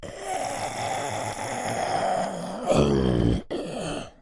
Monster growl 12
A monster/zombie sound, yay! I guess my neighbors are concerned about a zombie invasion now (I recorded my monster sounds in my closet).
Recorded with a RØDE NT-2A.
Apocalypse, Creature, Dead, Growl, Horror, Invasion, Monster, Monsters, Scary, Scream, Zombie